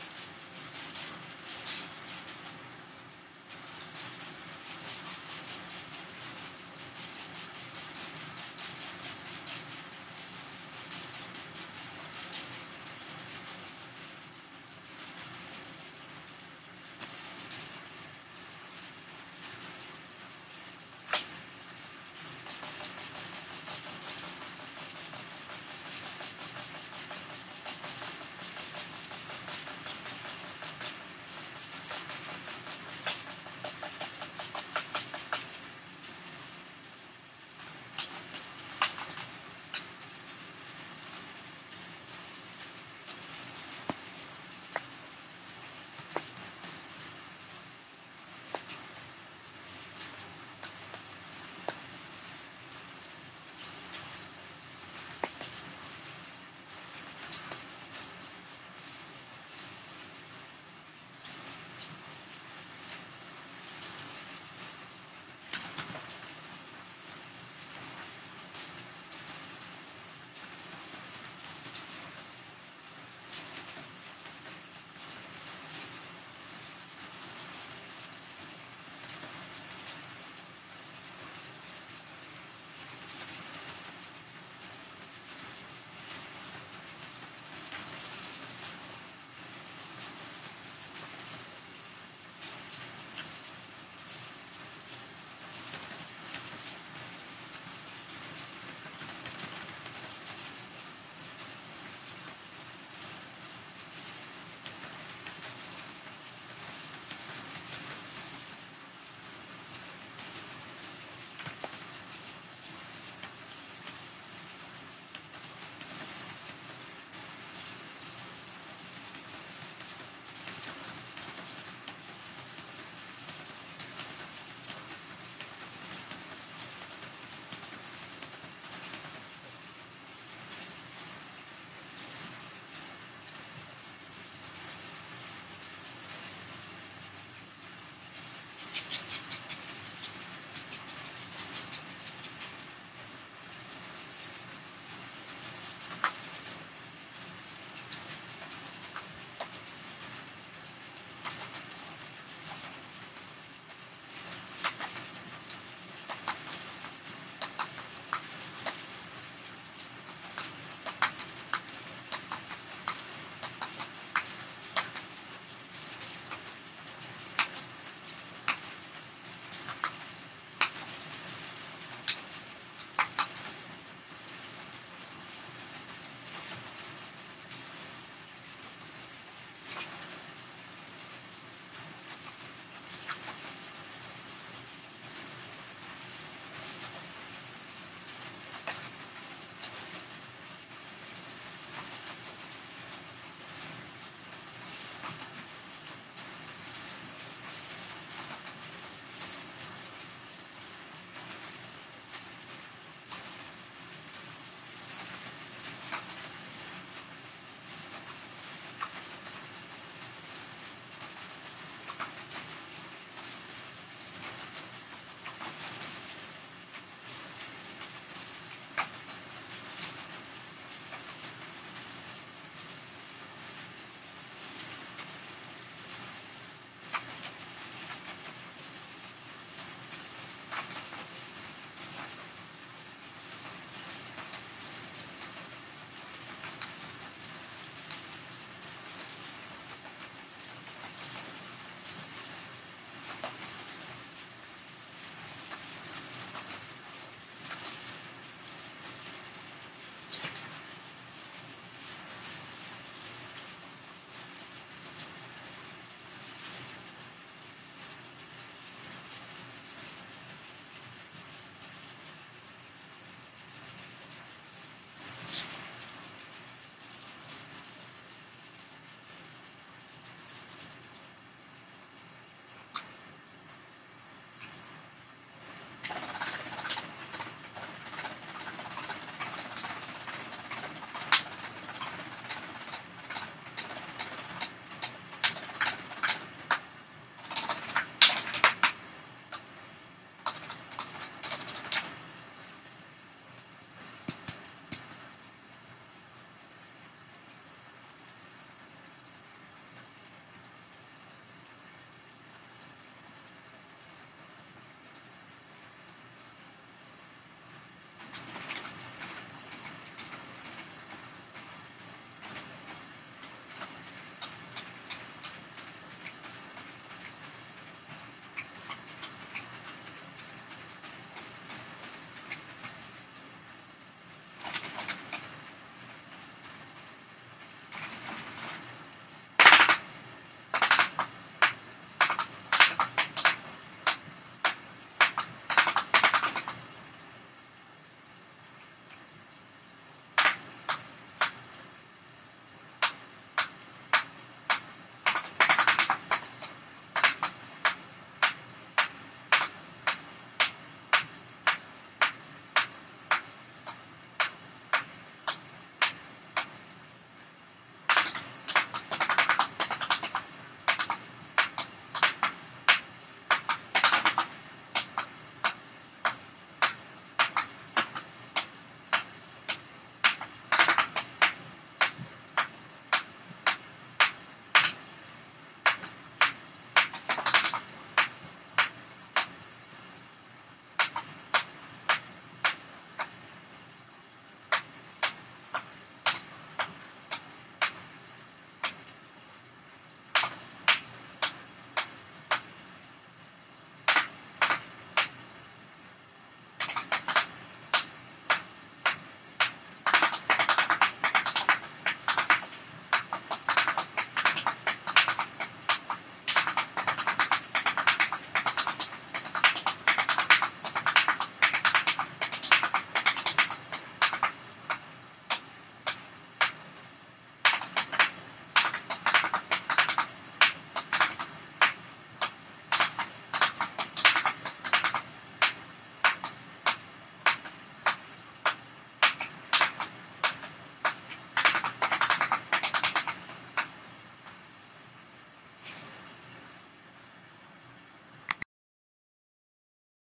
pinwheel sounds
I need a very slow propeller sound for a Vue Infinite flythrough. All windmill and prop sounds were too fast and mechanical. I created a sound by letting a fan hit and spin a pinwheel
pinwheel, propeller